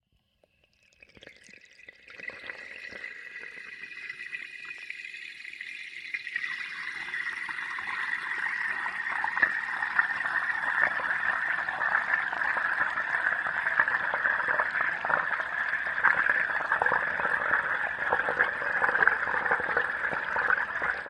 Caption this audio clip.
empty sink fill with water washbasin faucet hydrophone
A hydrophone in a emtpy washbasin, which is filled with water.